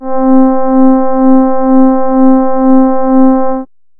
Warm Horn C4
An analog synth horn with a warm, friendly feel to it. This is the note C in the 4th octave. (Created with AudioSauna.)
brass, horn, synth, warm